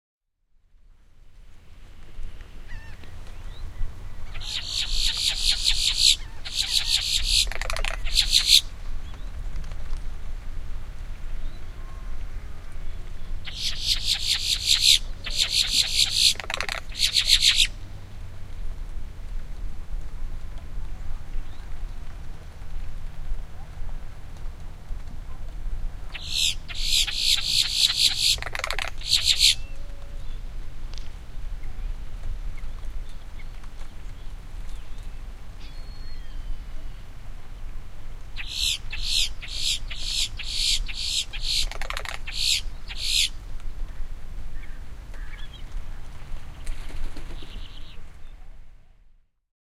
St. Marks NWR Boat-tailed Grackle
A Boat-Tailed Grackle in a Palm Tree near a small boat marina in St. Mark's National Wildlife Refuge, Florida. There are some sounds of human activity in the background.
bird, florida, marina